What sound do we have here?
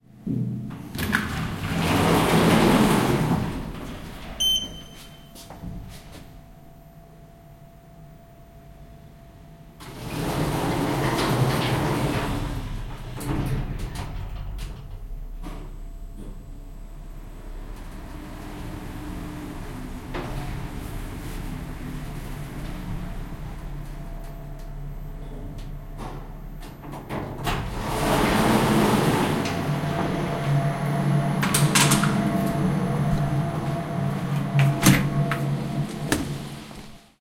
recorded in elevator going down
door, elevator, engine, lift